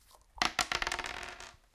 essen mysounds stefanie
a leather cup with dices
object, mysound, Essen